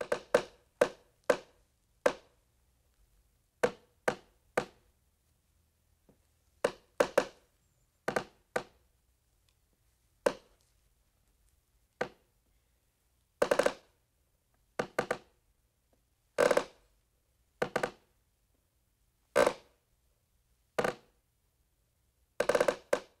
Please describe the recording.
wood that creaks or steps on the wood
board, cleaner, creaks, improvised, percs, plank, rubbish, steps, wood, wooden